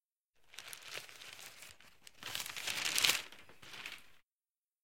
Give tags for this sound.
flip-page
paper-crumbling

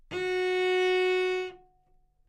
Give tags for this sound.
single-note
good-sounds
cello
multisample
neumann-U87
Fsharp4

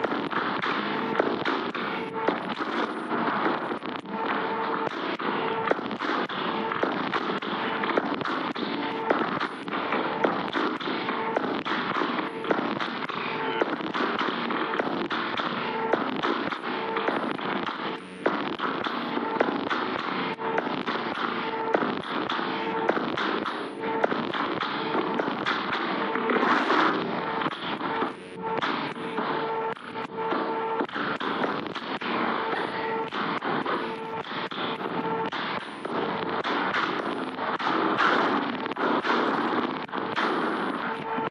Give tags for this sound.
Chirping
Loop
Machine

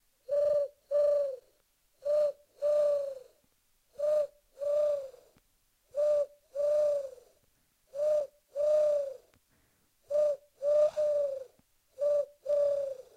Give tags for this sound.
pigeon
birds
cooing
animals

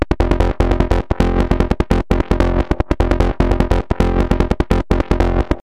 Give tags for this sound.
bassline
bpm
synthetic

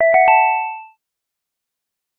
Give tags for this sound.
life
item
energy
game
pick-up
collect
object

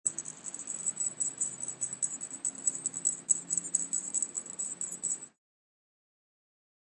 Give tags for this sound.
bells
magical

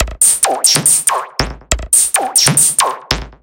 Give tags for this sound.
techno
tecno
minimal
electro
music
loop
house

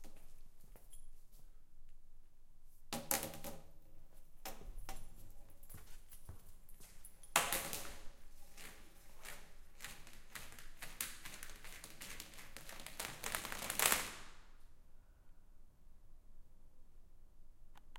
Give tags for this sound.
recording
hoop
domestic-sounds

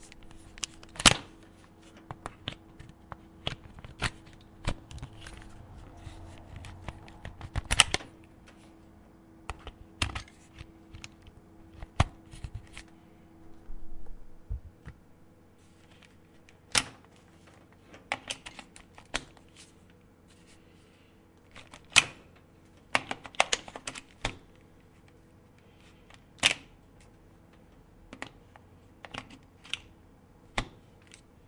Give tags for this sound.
plug
electricity

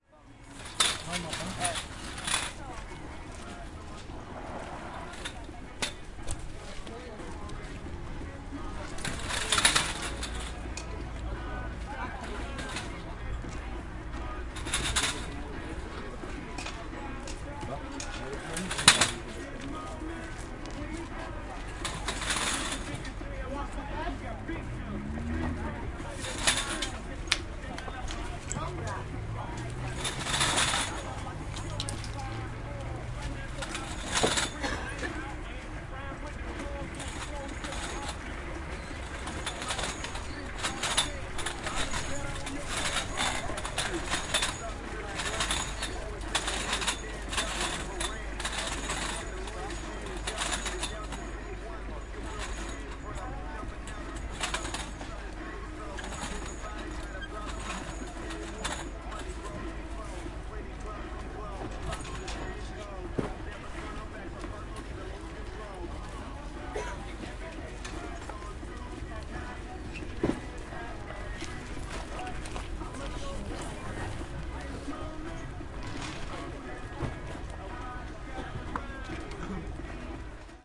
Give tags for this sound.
ambience
field-recording
hubbub
marketsquare
Poland
Szczepin
Wroclaw

